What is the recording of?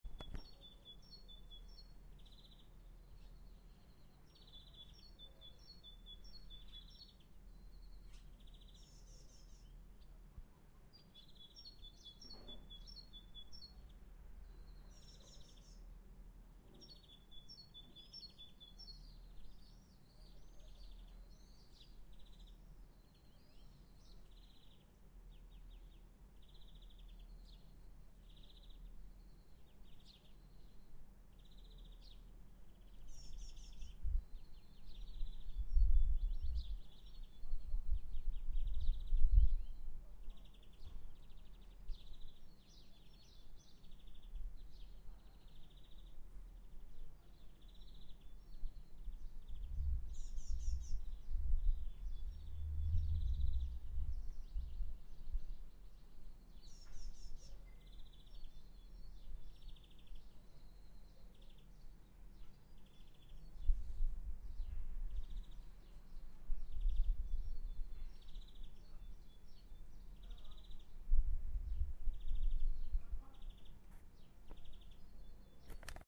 A recording of the birds in my garden singing. Nice and busy.